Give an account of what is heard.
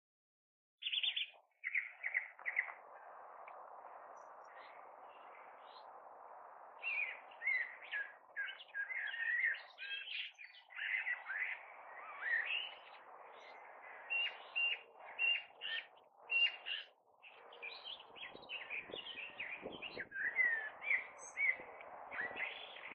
Birds in a garden
Taken while videoing on a Nikon Coolpix p520 in the Dahlia garden at Anglesey Abbey NT
ambience, field, garden, recording-Large